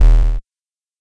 Over processed very deep bass. One hit (though it sounds like two).